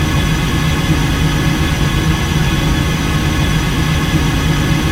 Created using spectral freezing max patch. Some may have pops and clicks or audible looping but shouldn't be hard to fix.
Atmospheric, Background, Everlasting, Freeze, Perpetual, Sound-Effect, Soundscape, Still